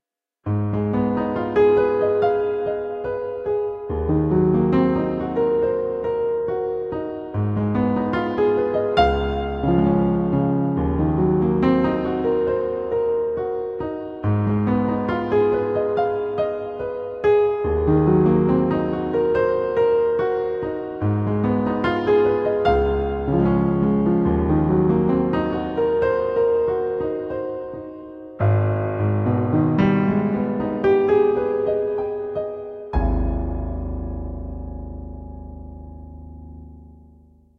Short melancholic theme on piano
The beauty of minor6 chords which I love the most.